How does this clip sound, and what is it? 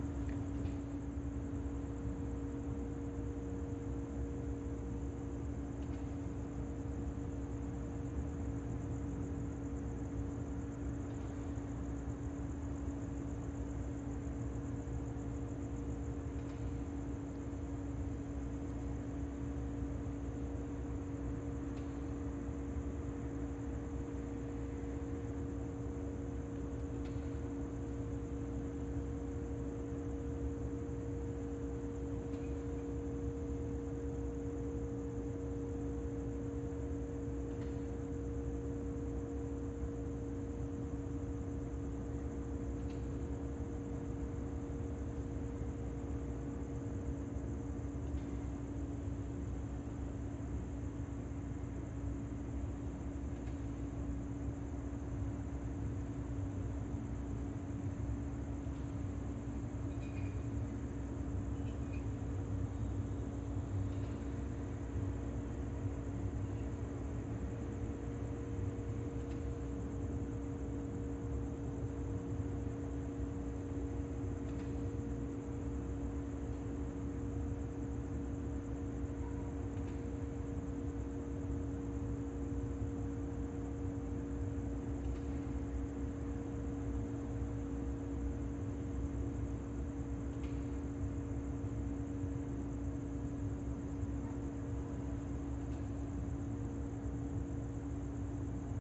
Ambience recorded at a dry river Channel in suburban Monterrey, Mexico with the constant drone of a factory nearby (with clicking and vapor hisses once in a while). Crickets, a little stream and distant traffic.